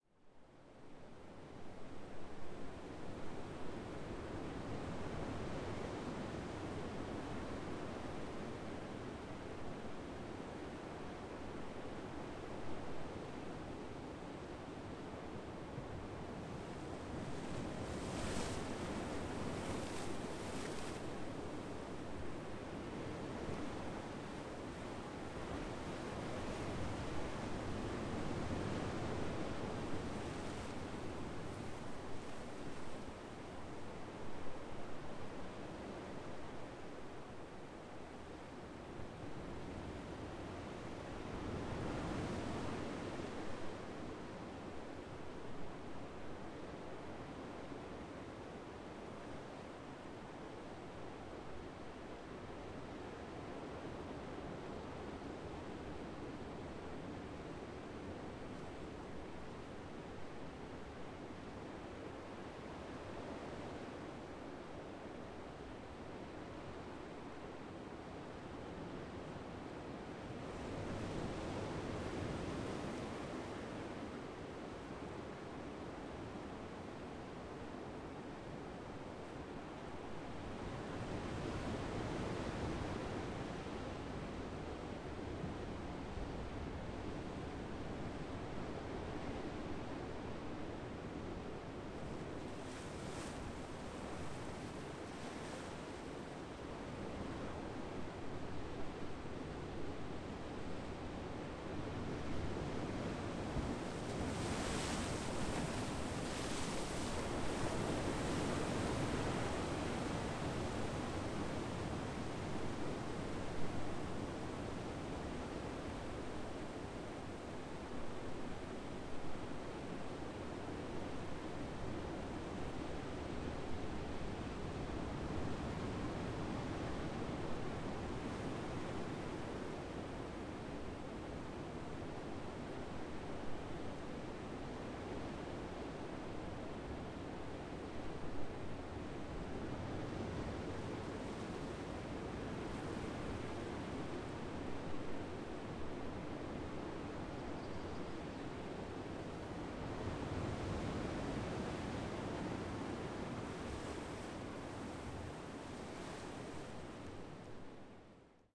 Calm seawaves at Madeira, porta del cruz
Recorded with Rode VideoMicro and Rodeapp in iPhone
waves, beach, ocean, crickets, seaside, water, breaking-waves, field-recording, coast, rocks, madeira, surf, nature, atlantic, seashore, night, wave, shore, sea-shore, sea